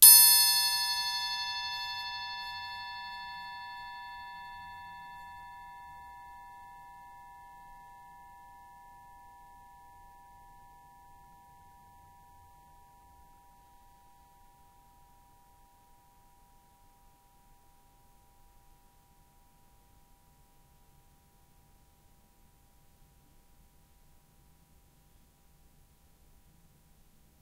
Punch to music triangle.
Recorder: Tascam DR-40.
External mics.
Date: 2014-10-26.
musical, punch, triangle